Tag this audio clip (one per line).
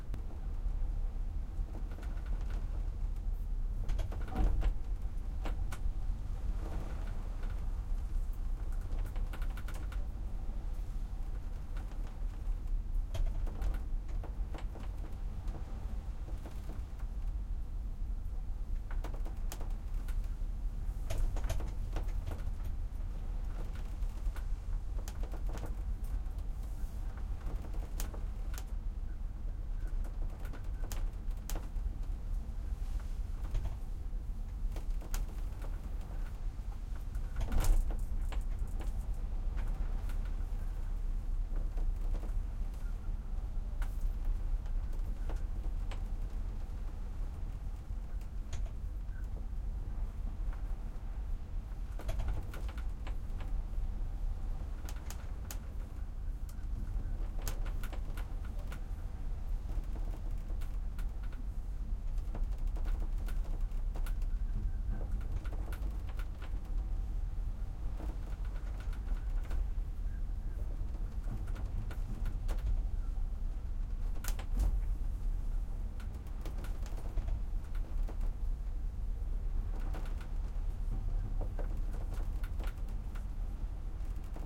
cabin
rattle
shipscabin
ocean
ship